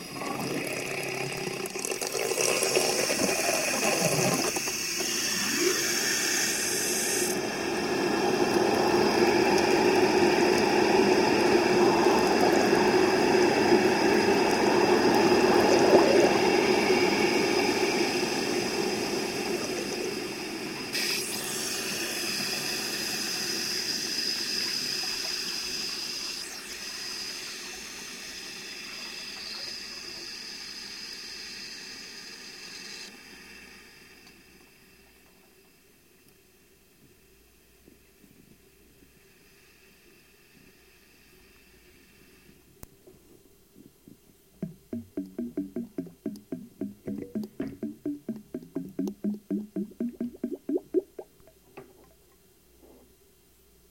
Turning on and off the faucet of my bathtub rather slowly. Recorded with a Cold Gold contact microphone into a Zoom H4.
bath, contact, faucet, flow, liquid, tub, water